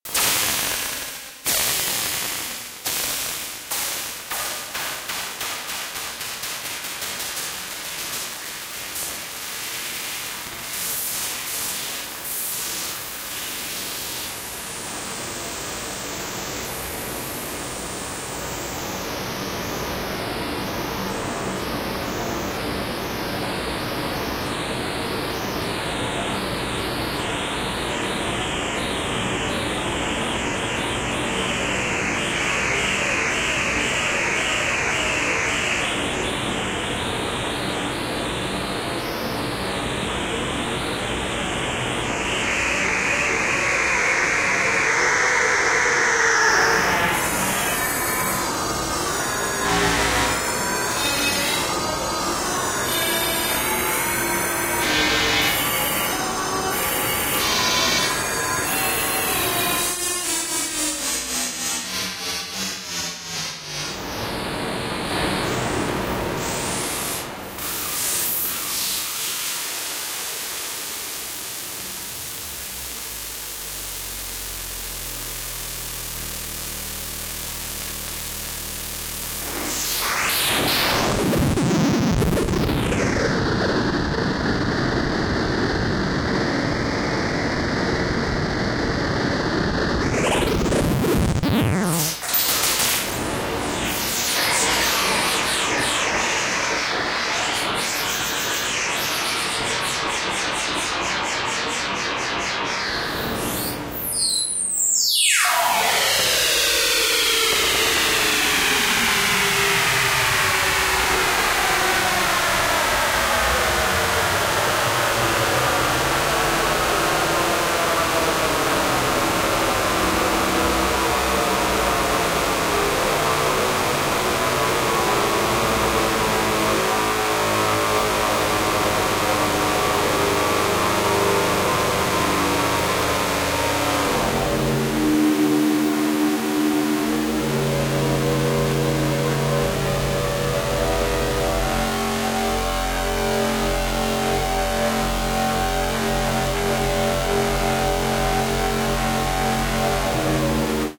Science in a cave washing the walls with noise patterns.
science, sci-fi, sfx, electronic, synth, atonal, abstract, electric, sound-design, weird, sounddesign, effect, static, soundeffect, atmosphere, digital, noise, glitch, fx, wash, ambience